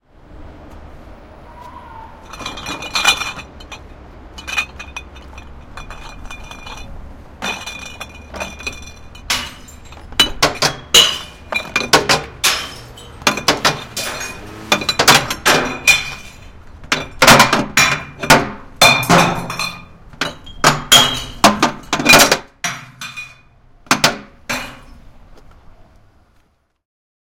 throwing out glass breaking close perspective city ambience
Recorded with a Sony PCM-D50 from the inside of a peugot 206 on a dry sunny day.
One day I was throwing out a bunch of glass bottles for recycling in Amsterdam, I thought why don't I share this with you.
out, throwing, city, ambience, background, close, perspective, glass, screaming, breaking